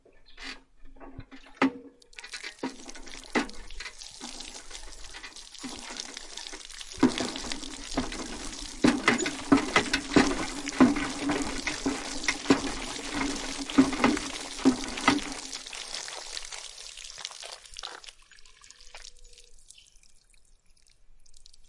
| - Description - |
Using an old manual water pump